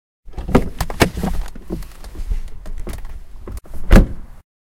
Car Door open and close 2
opening and closing car door